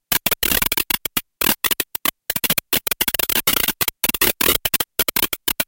quick static glitches
Made with FL Studio 20 by pressing a bunch of keys randomly with a short-lasting note time. Recorded in Audacity.
broken, camera, effect, effects, electronic, fx, glitch, glitches, glitching, glitchy, loop, noises, quick, sharp, sound, static